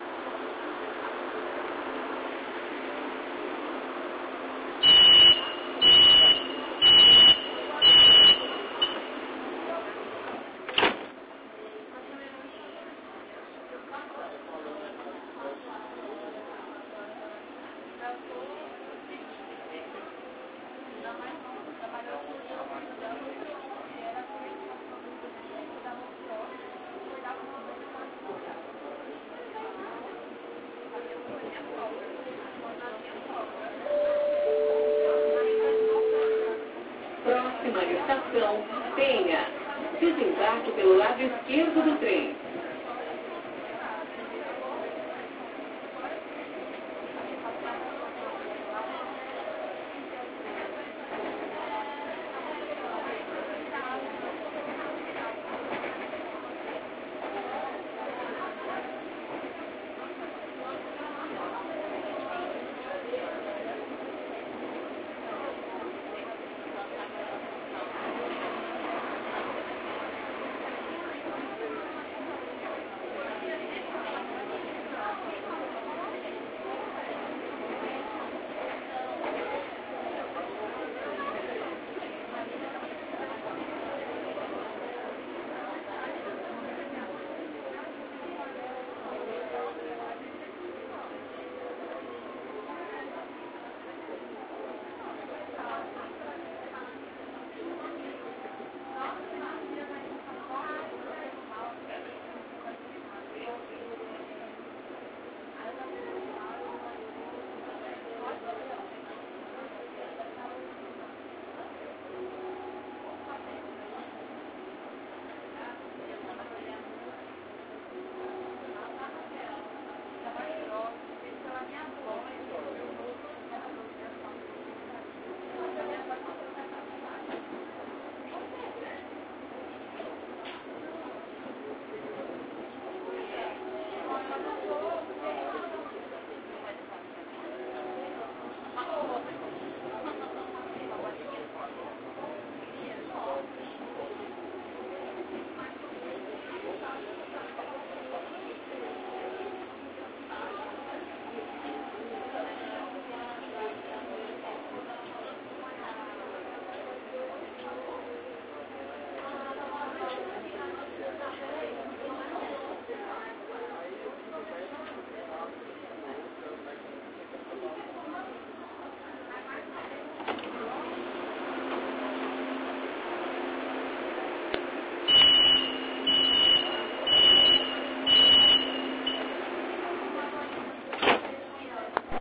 subway metro2 - Felipe RuizBRX11
Ambient sound of subway in Sao Paulo, with voices and doors noises.
Som ambiente de metro, com vozes e barulho das portas.
ambiance, ambience, ambient, ambiente, atmosphere, background, background-sound, barulho, field-recording, general-noise, metro, noise, som, soundscape, subway, tunel